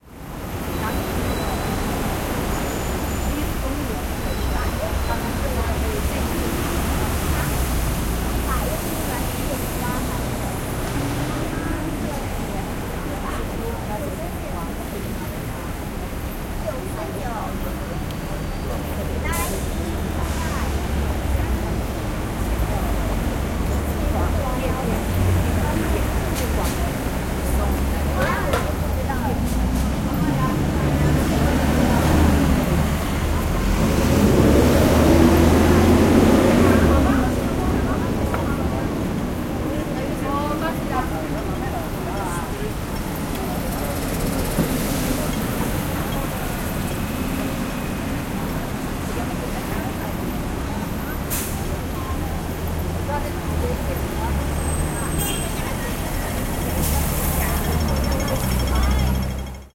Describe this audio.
Sound walk to the Bus Stop in Macau
macao, street-sound